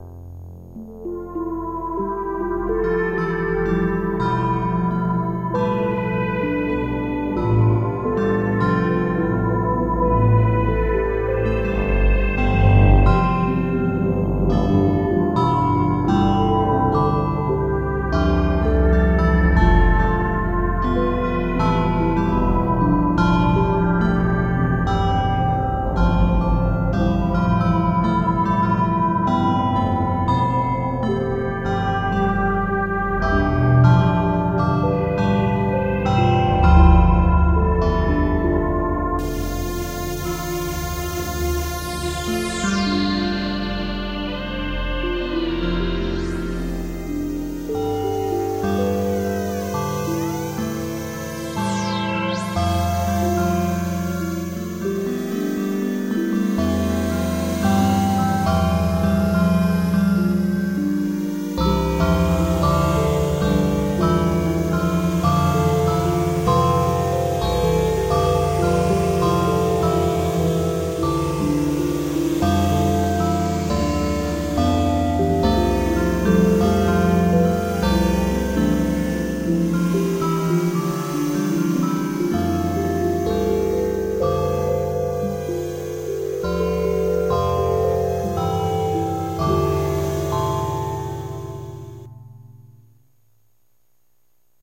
IDM Melody
Hey, Haggled a pawn shop owner into selling me an Alesis Micron for 125$ hehe, I have some sex appeal baby. *blush*
These some IDM samples I pulled off of it by playing with the synth
setting, They have went through no mastering and are rather large
files, So or that I am sorry, Thanks! This was a bunch of tracks all
recorded in Audacity one on top of the other;
alesis, ambient, canada, idm, sfx